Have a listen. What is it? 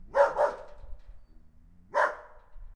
Two dog barks.
Bark, Barking, Dog, Field-Recording, Hound, Night